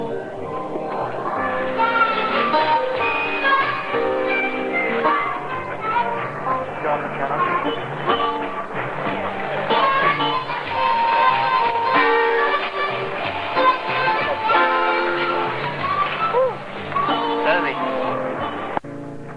some recording walking by a jazz bar, in 2012